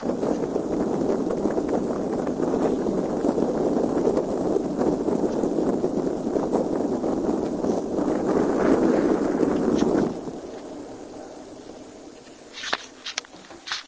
This is a heater, and it also sounds similar to Fire and Wind.